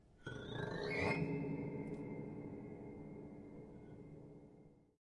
Horror piano strings glissando up high strings
Creepy sound produced with an old upright piano's upper strings. A short glissando played upwards on the piano strings with fingers. Damper pedal held down. Recorded with ZOOM H1.
creepy, effect, suspense, scary, horror, film, haunted, piano, dramatic, strings, glissando, spooky